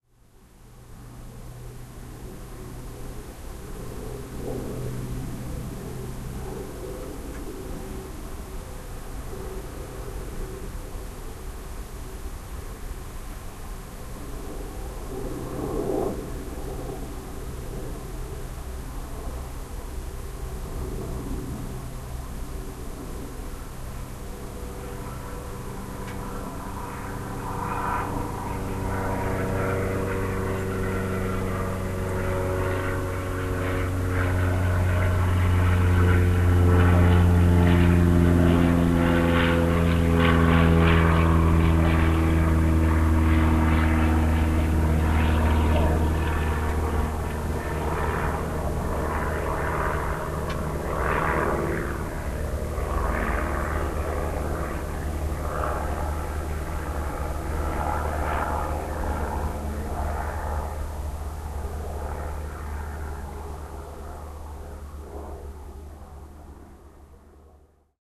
A single prop passenger plane takes off from the private jet center attached to an international airport.
aircraft, airplane, passenger-plane, plane, propeller, single-prop, take-off